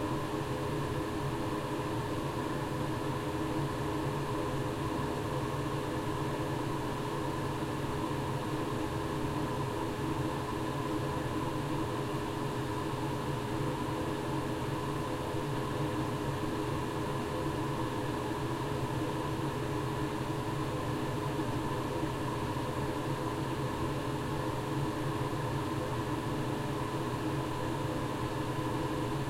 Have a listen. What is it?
Roomtone Bathroom With Vent
bathroom with vent on room tone
bathroom, room-tone, vent